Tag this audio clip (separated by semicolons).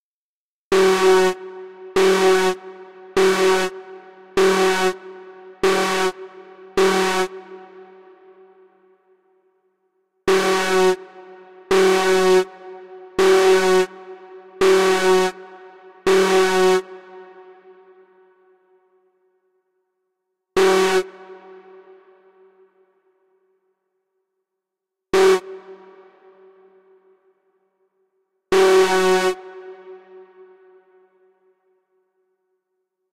fx; sound-design